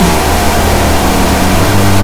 A jumpscare/screamer sound that can be used for a horror game. I yelled into the mic, I then edited it with a few effects, then I added one of the snare samples built into the DAW, then I added a synth (fake synth built in to the DAW) and then heavily distorted it with a few more effects. My yelling was recorded with my phone's built-in mic and the DAW I used was FL Studio Mobile (used cloud storage to transfer to my computer). I made this for my Five Nights at Freddy's fangame.
Hope you like it!